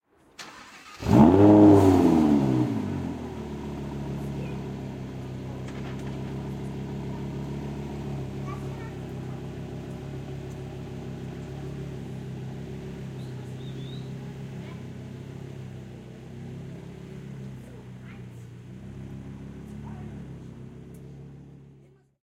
Lambo Start Up Sound
Starting a Lamborghini Huracán EVO Spyder.
Recorded on a Tascam DR-07 with the internal mics. There are some kids in the background later on, but the startup should be clean...
Engine, Idle, lamborghini, Start, Transportation